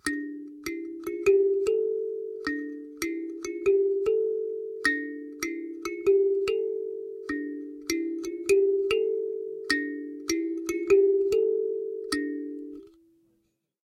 Kalimba (easy melody 2)
A cheap kalimba recorded through a condenser mic and a tube pre-amp (lo-cut ~80Hz).
instrument, ethnic, piano, kalimba, african, melody, thumb-piano, loop, thumb